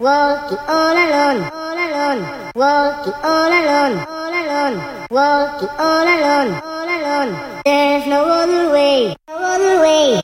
A Lonely Voice.Recorded at 94bpm.